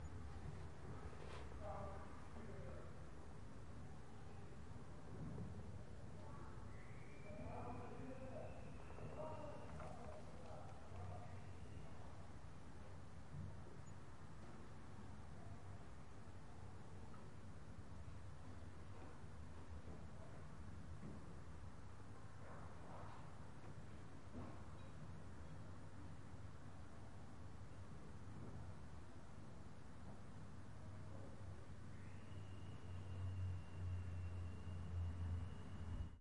Apartment roomtone, staircase walla
room, indoors, neighbours